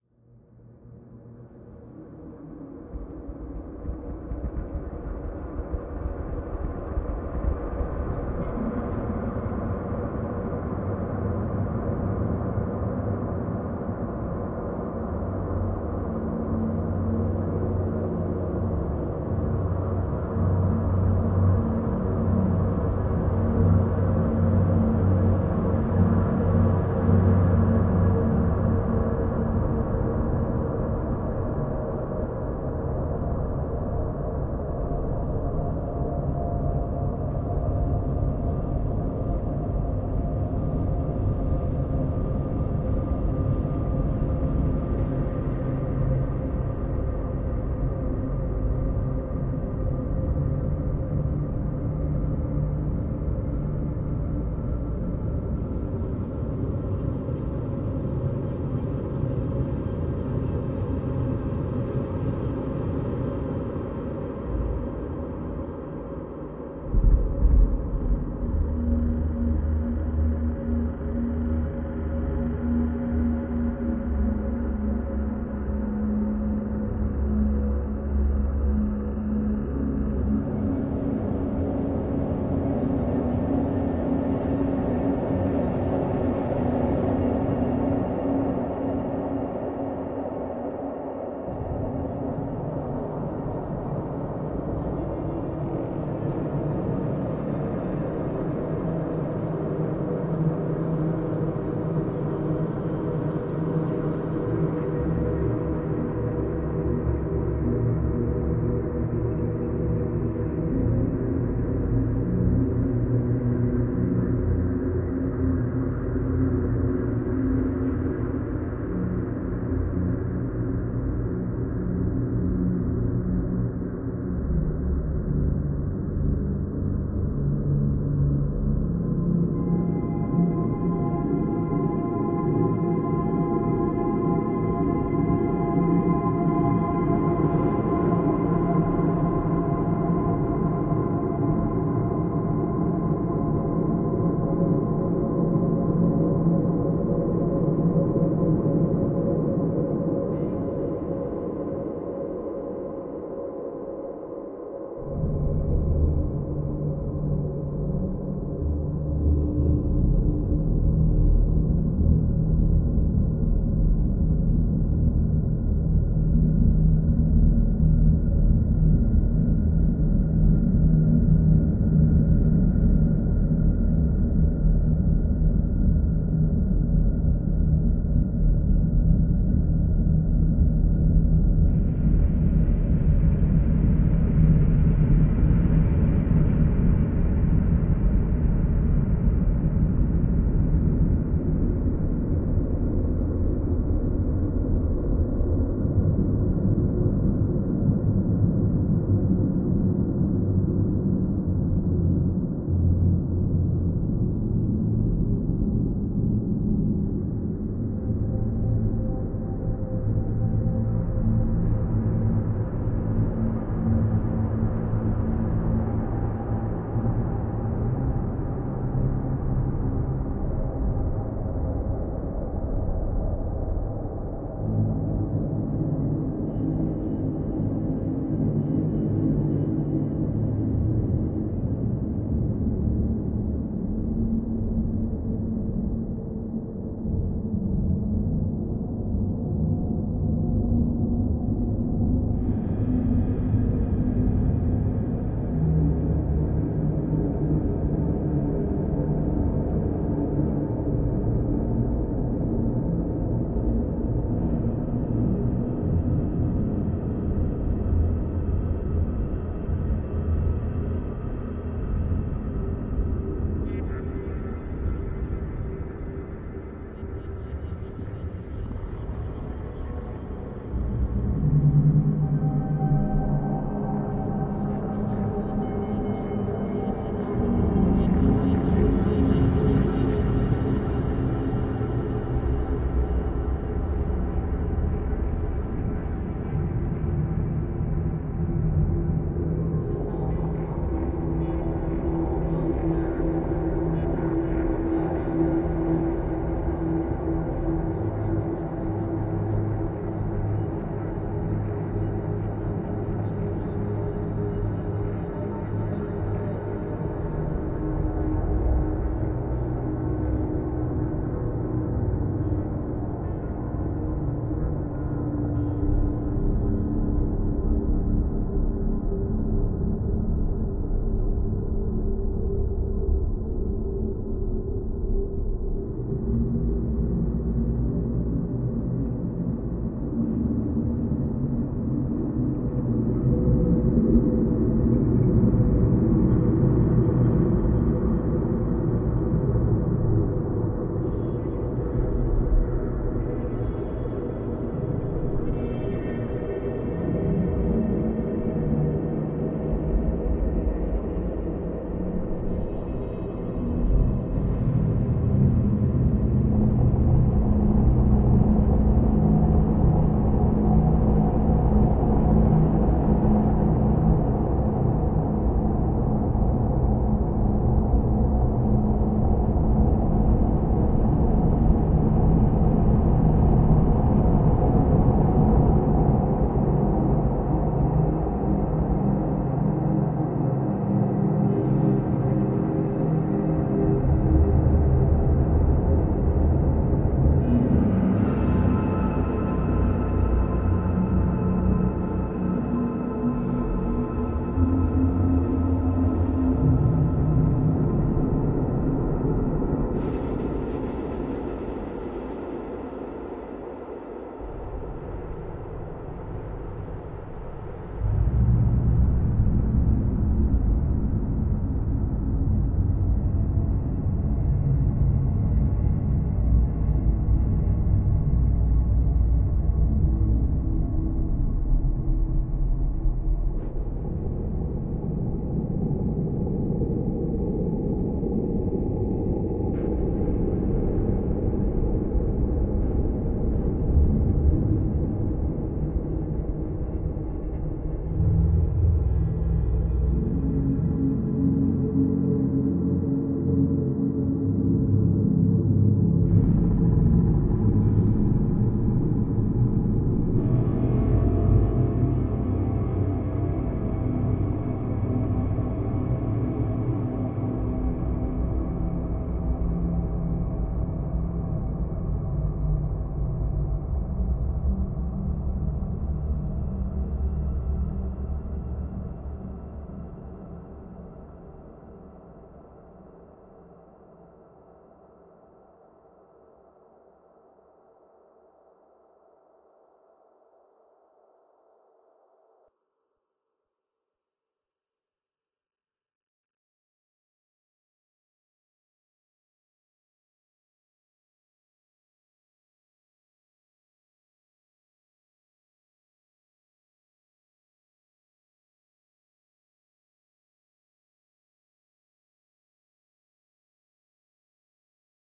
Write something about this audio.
Dark Ambient 021
dramatic, ruido, atmo, weird, background-sound, suspense, experimental, horror, atmosphere, terror, tenebroso, evil, creepy, white-noise, sinister, ambience, haunted, soundscape, ambient, film, terrifying, background, thrill, oscuro